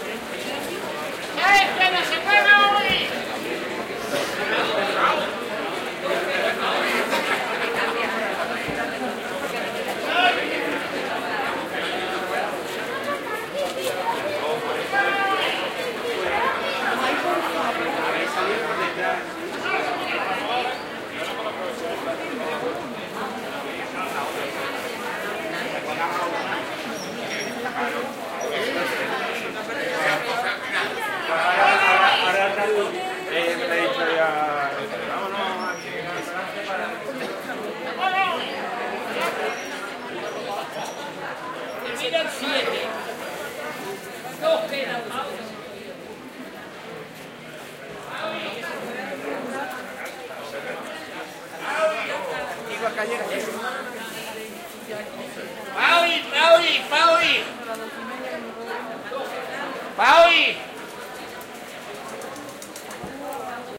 20060311.street.voices
lively ambiance of pedestrian street with lottery peddlers many other voices / ambiente de calle peatonal con muchas voces, incluyendo vendedores de lotería
streetnoise, voice, binaural, field-recording, city